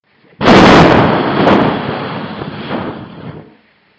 An artificial thunder I recorded for one of my projects. I blew my microphone to make this effect and pitched it a bit lower and added a slight reverb in Audacity.
Coincidentally, this is actually recorded on a rainy day. XD
mouth, sfx, sound, rumble, effect, artificial, thunder